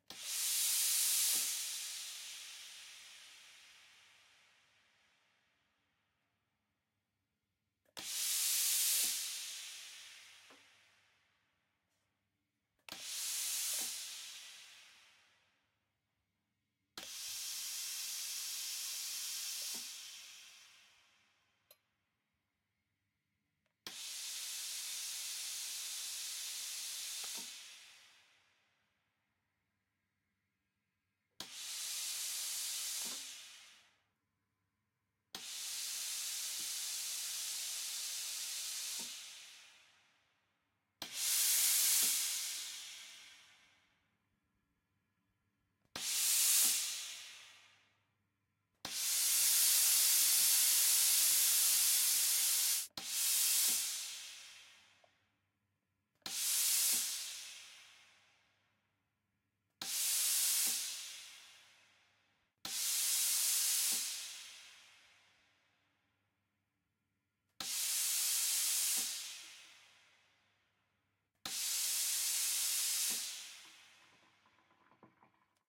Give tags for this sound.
air hiss iron

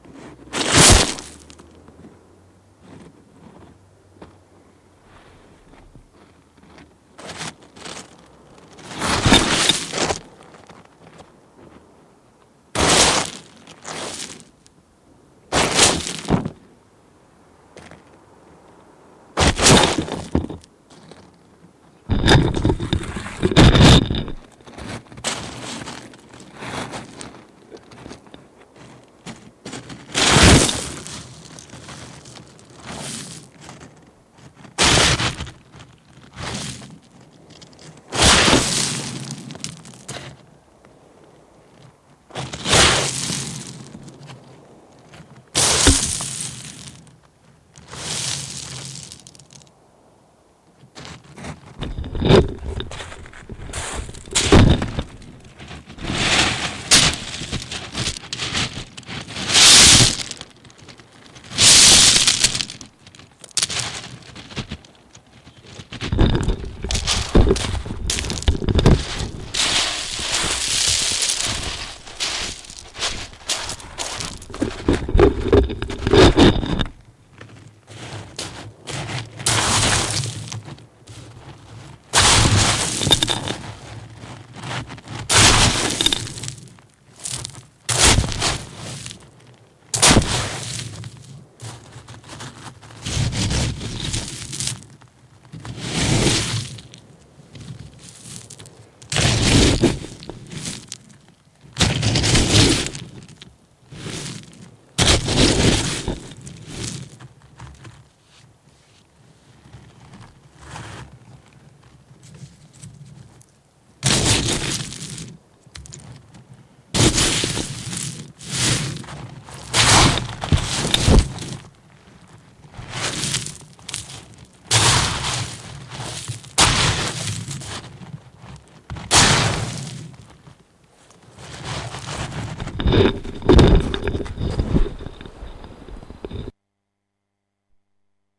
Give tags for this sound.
beach dig rocks

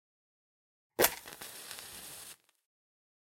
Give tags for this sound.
ignite matches light match

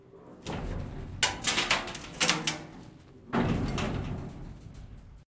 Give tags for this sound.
bin charity field-recording collection

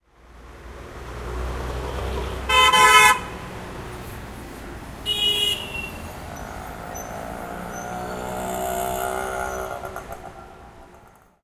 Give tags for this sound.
auto deep honk horn India medium motorcycle nice pass real speed throaty

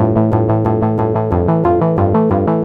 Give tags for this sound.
91 synth loop bpm

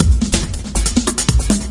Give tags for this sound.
beat electronic

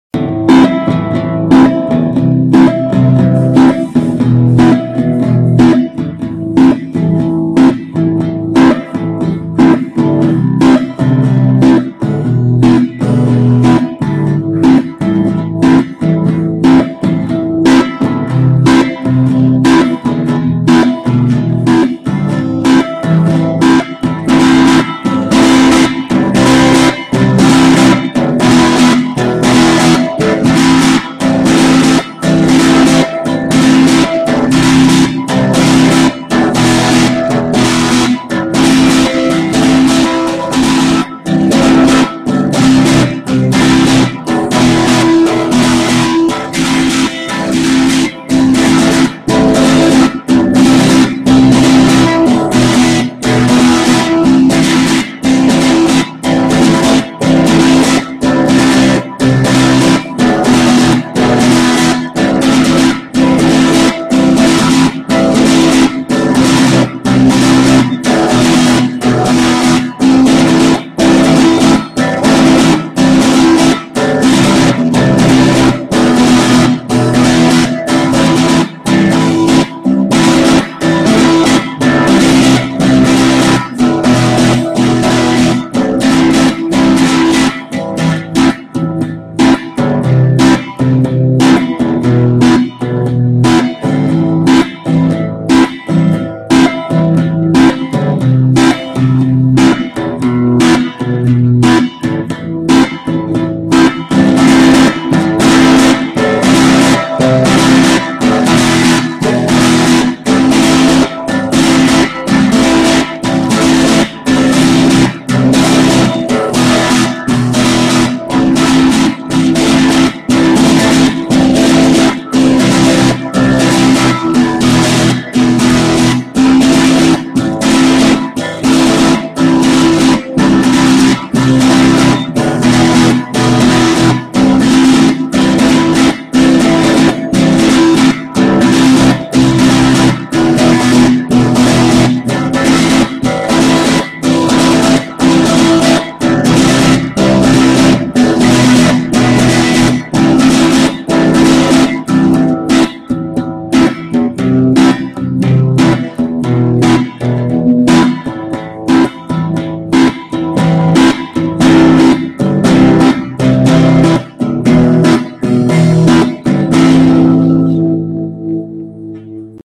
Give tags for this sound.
drums caribbean strumming suspense emotive distortion rhytm accoustic-guitar beach ambience hammer-on drum

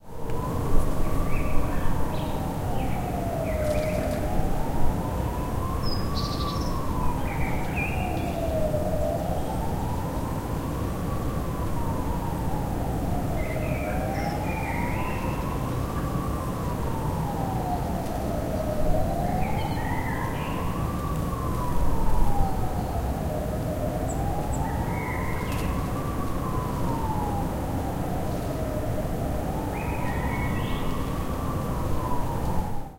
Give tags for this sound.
2017 22march birds Brussels citycenter nature police siren

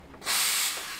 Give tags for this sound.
vapor noise coffe machine

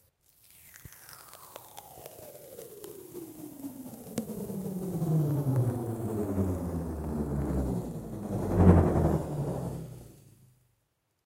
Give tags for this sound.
wars aliens tape space laser

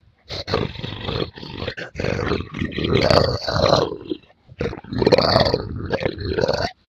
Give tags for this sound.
monster snarl growl beast hound animal

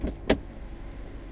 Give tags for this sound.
open car door

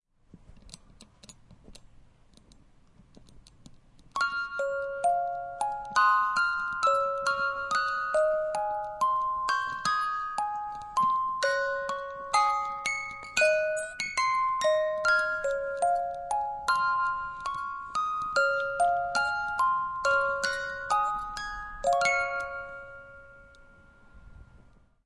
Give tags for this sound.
musical-box white-christmas

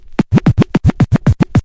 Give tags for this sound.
beat turntable kick hip

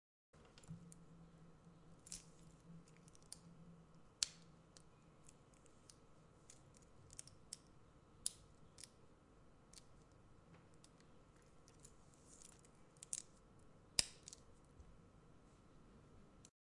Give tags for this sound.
metal watch pocket technica clasp owi click time-piece wrist

